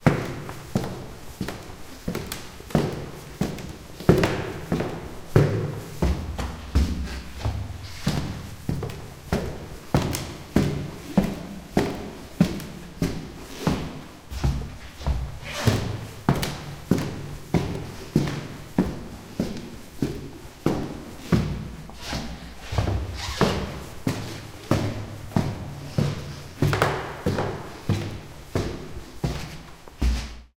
Climbing Stairs in Boots
Walking up stairs in boots, with a recorder in the hands. Movement of clothing can be heard too well.
walk, clothing, seamless, hollow, echoing, rubber, footstep, footsteps, boots, boot, wooden, floor, gapless, loop, walking, movement, medium-speed, wood, squeaking, game-design, squeak, echo